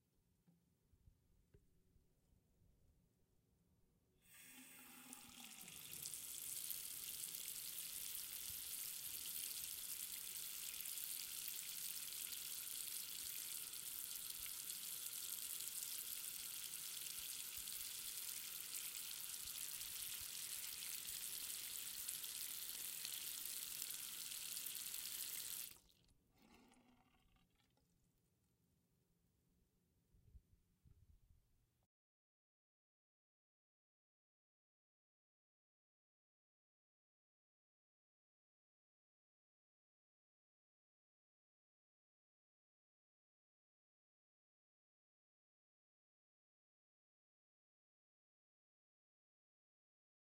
untitled sink water
sink, water